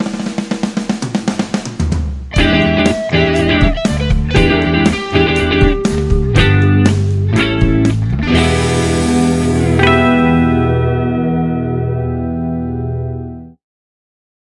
Rock music intro for podcasts or shows
This is a short rock music that will be ideal for the introduction of a podcast/show or for a transition.
intro, transition, introduction, programs, show, blues, solo, music, guitar, groovie, rock, podcast, broadcast